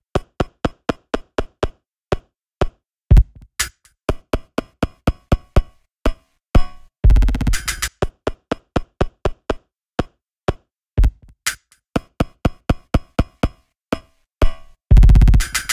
Loop Addon 4 122 bpm
122, loop, 4, bpm, addon